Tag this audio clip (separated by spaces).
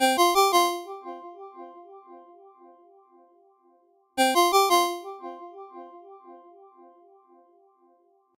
alarm alert alerts cell cell-phone cellphone mills mojo mojomills phone ring ring-tone ringtone